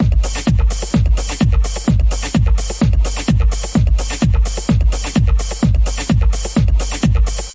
Techno drum loop 002
techno drum loop at 128bpm. 4bars
4bars, drum, 128bpm, techno, loop